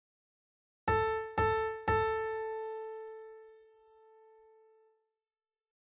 A Piano Sample
sample, a, piano